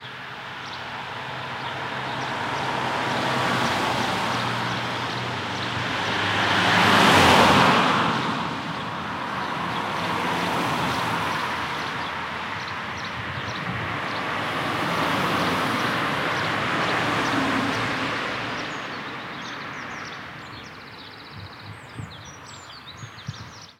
Sound of some passing cars after each other. Recorded with a Behringer ECM8000 lineair omni mic.